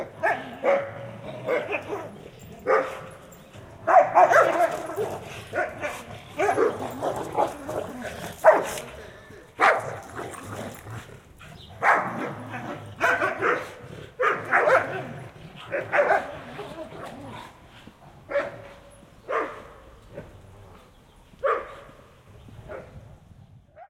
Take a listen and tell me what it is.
Two enormous dogs are playing by pulling a deflated ball. One person says "go ahead" when I pass too close to them.
Recorded with a Sennheiser Ambeo Smart Headphones.
Normalized, hum and hiss reduction with Audacity.